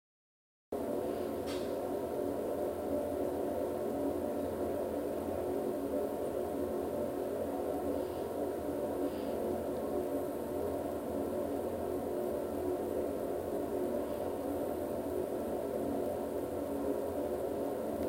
This is the raw file with no sound effects added. I constantly go to this bathroom at my school and man I though I was on some ship or something. So I recorded it. Well doesn't sound well, but at least its an interesting sound.